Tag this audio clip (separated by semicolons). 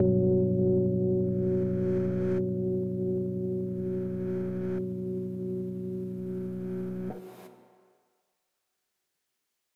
Looping; Drums; Ambiance; Loop; commercial; Cinematic; atmosphere; Piano; Ambient; Ambience; Sound-Design